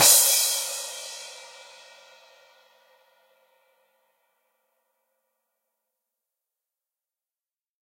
MFRP CYMBAL STRIKE 001
A very nice cymbal strike in stereo. There's a slight remnant of the kick drum on the attack but this should be unnoticeable in your mix. Neumann TLM103 x2 with Millennia Media HV-3D preamp.
cymbal, drums, stereo, strike